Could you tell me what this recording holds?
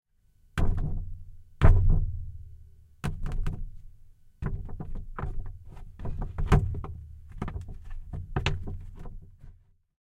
Plastic Sheet Fluttering
Noise of big plastic, made with a bassdrum skin. Recorded in stereo with RODE NT4 + ZOOM H4.
flaunt, flaunting, flutter, plate, rumbling, sheet, wapperen, wave, waving